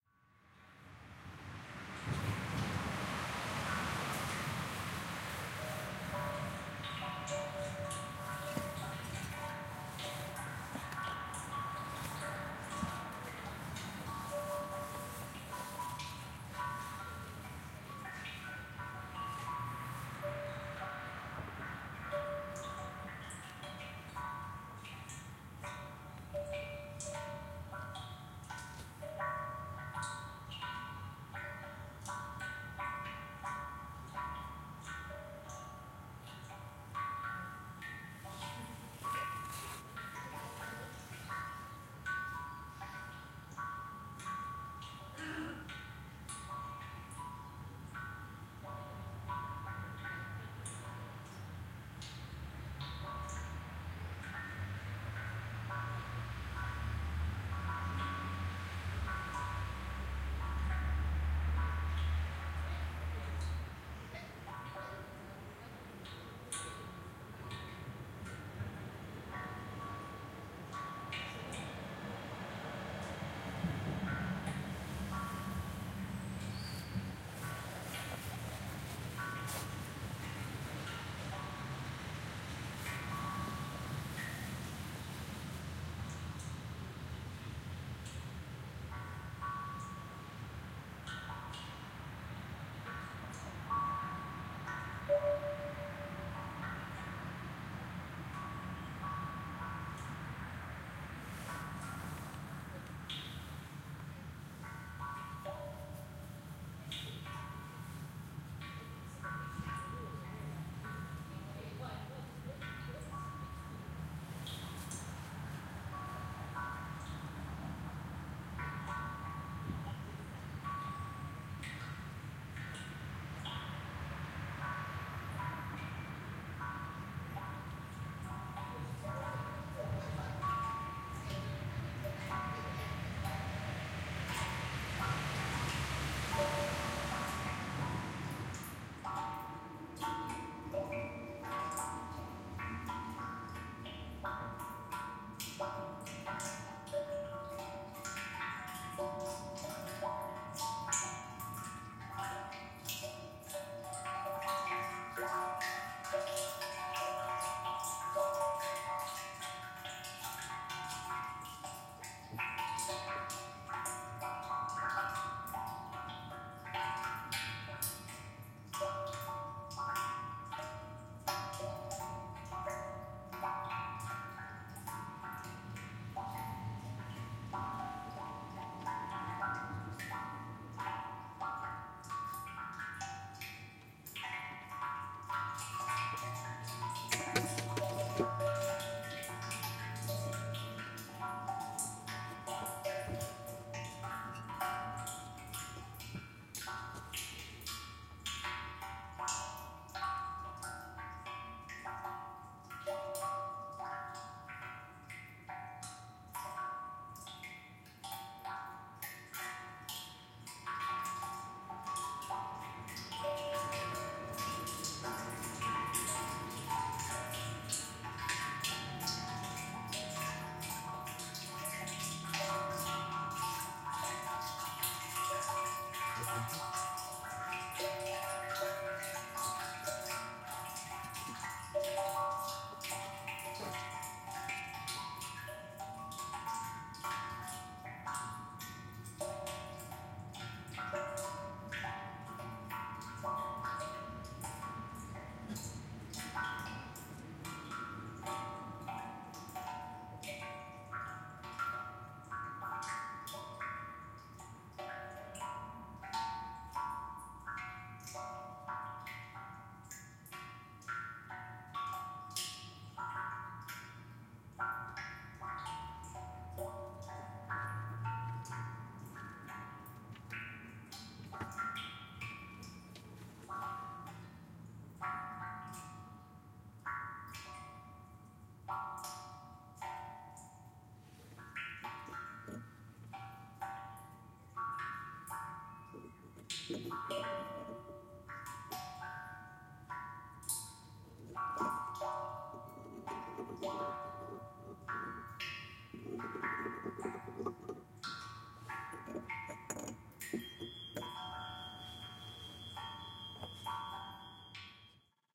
Recording made during the final touches and adjustments to the construction of a just completed sonic fountain in the former botanical garden of Genzano. Water is slowly poured on the soil and the droplets produced in a cavity inground are brought to the surface by means of pipes from which it is possible to listen to the sound.
Recorded with a Zoom H4n.
Registrazione realizzata durante gli ultimi ritocchi ed assestamenti alla costruzione appena ultimata della fontana sonora nell'ex-Orto Botanico di Genzano. Dell'acqua viene versata lentamente sul suolo e le gocce prodotte in una cavità interrata vengono portate in superficie tramite dei tubi dai quali è possibile ascoltarne il suono.
Registrato con uno Zoom H4n.